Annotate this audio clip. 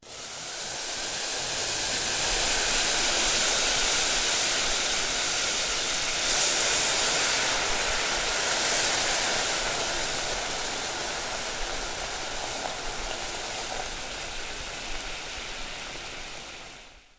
Boiling water gently poured onto a hot metal saucepan.